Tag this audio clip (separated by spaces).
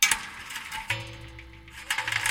screech ice dry abuse scratch